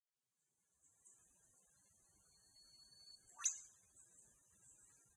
A quick recording of two Eastern Whip Birds, the male starts the call and the female answers. Recorded at sunset (7pm) at Terrey Hills, Sydney.
animals, australian, austrlian, bird, bush, call, eastern, hills, perimeter, song, terrey, track, trail, whip